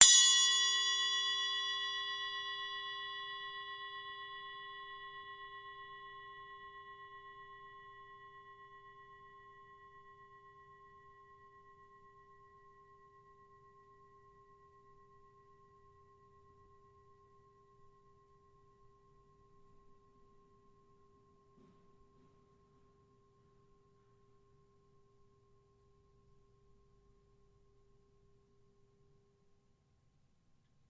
zilbel 6in hrd4
After searching the vastness of the interweb for some 6 inch zilbel samples with no luck, I finally decided to record my own bell. Theres 3 versions of 4 single samples each, 4 chokes, 4 medium and 4 hard hits. These sound amazing in a mix and really add a lot of life to your drum tracks, they dont sound over compressed (theyre dry recordings) and they dont over power everything else, nice crisp and clear. Ding away my friends!
zildjian-bell, zildjian-zil-bell